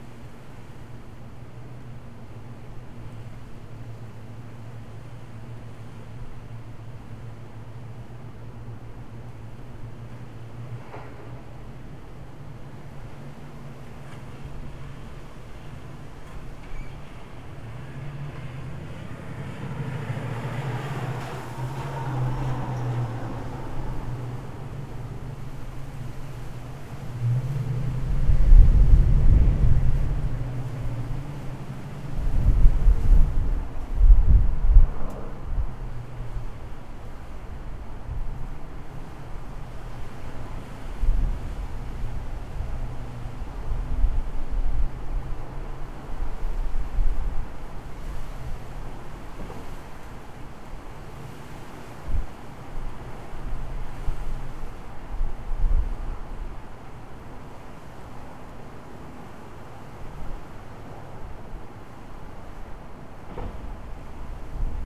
I stayed home from work to record a hurricane and all I got was this.... recorded with B1 in my living room with the mic sticking out of the door... i will post a link to the pictures when I post them....
wind, storm, hurricane